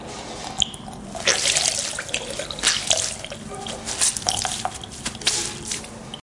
Squishing soap out sponge OWI
out, OWI, soap, sponge, Squishing